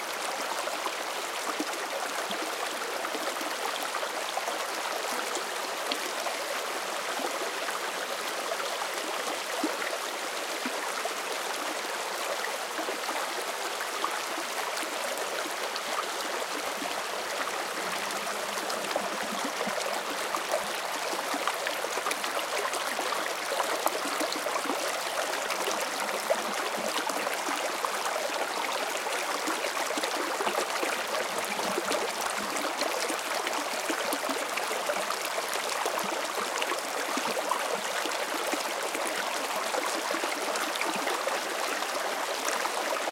Creek in Glacier Park, Montana, USA